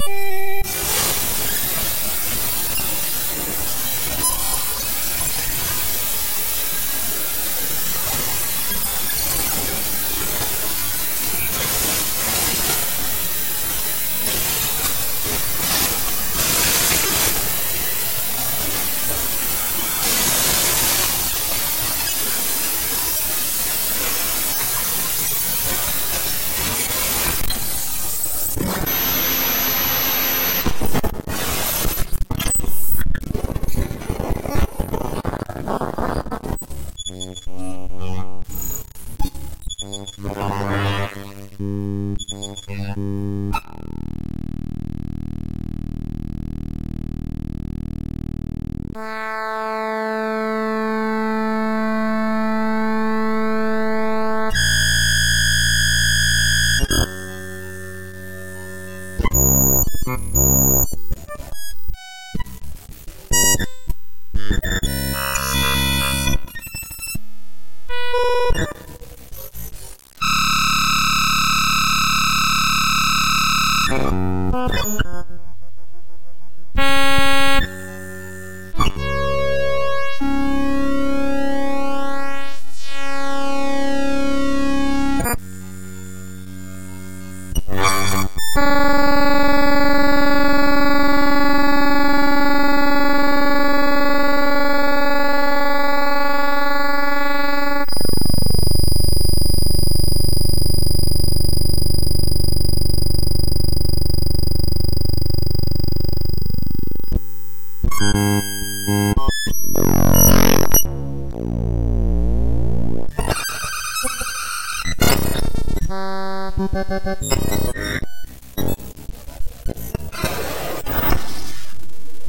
Strongly dehissed, normalized, both channels mixed together.